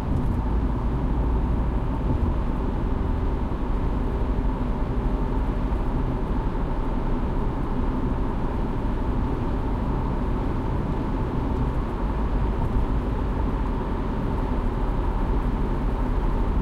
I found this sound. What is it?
Honda CRV, driving on a highway at 100 km/h. Recorded with a Zoom H2n.